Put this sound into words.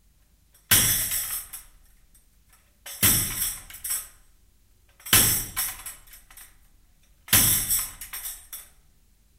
Rattle on a wooden staff.
age, ancient